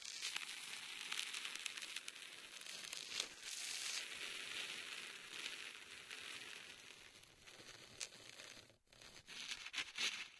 Queneau frot metal 03
prise de son de regle qui frotte